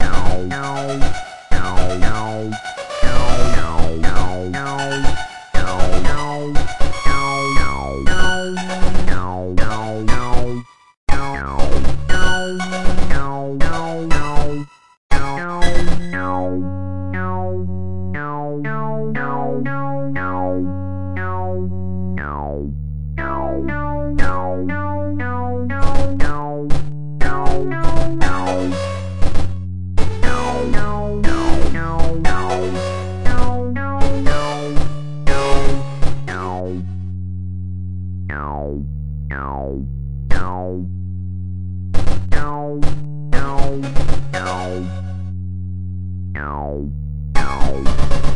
My first piece of music created in Musagi.
Judging by the rating, I'm not the only one who thinks it's crap. Well, there's a second one pending moderator approval, so we'll see about that :c